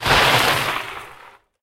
Grabbing a big heap of gravel.
Mix and minimal cleanup of: